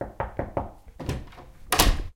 knocking on the door
Essen, Germany, January2013, SonicSnaps